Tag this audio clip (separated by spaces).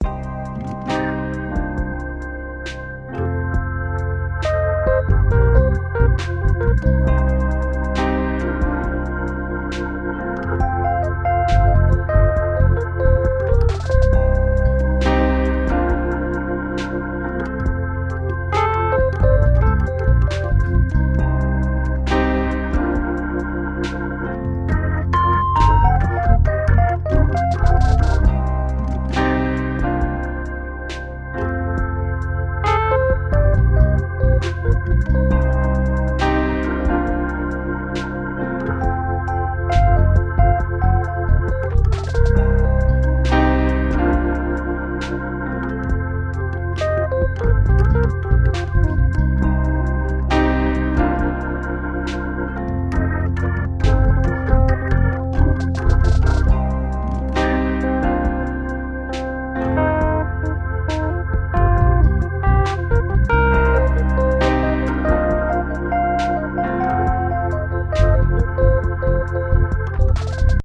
blues transitions ambience jazz loops funk atmosphere